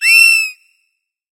Moon Fauna - 140
Some synthetic animal vocalizations for you. Hop on your pitch bend wheel and make them even stranger. Distort them and freak out your neighbors.
animal, fauna, sci-fi, vocalization